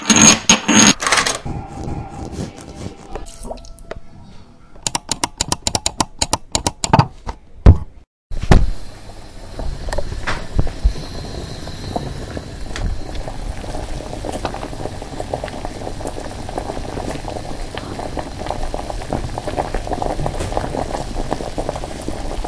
Sonic Postcard AMSP Nayeli Coraima
Barcelona, SonicPostcard, Spain